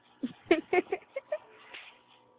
She giggles like a little skoolgirl.
woman, english, girl, female, voice